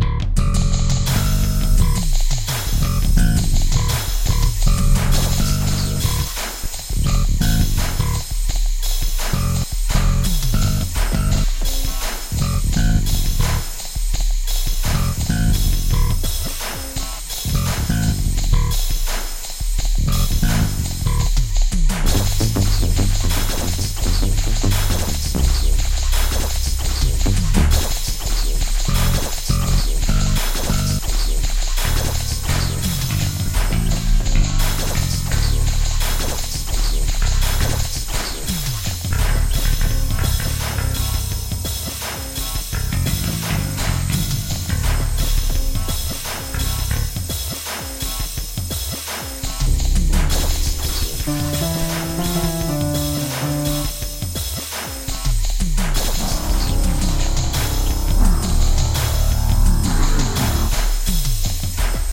Hydrogen advanced drum software, Yamaha PSR463 and Audacity.